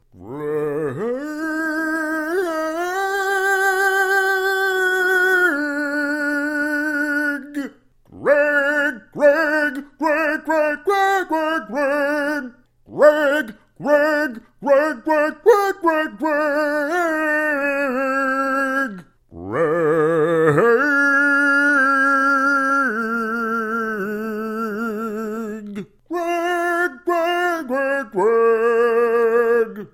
Deadman laying down some operatic phrases about obscure people from decades ago.
deadman, male, opera, vocal, voice